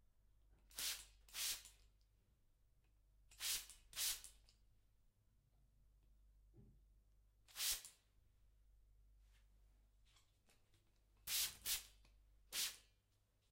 Using a garden sprayer in a bathroom. Recorded with an AKG C414 into a Focusrite Saffire Pro 40.
spray, water, bottle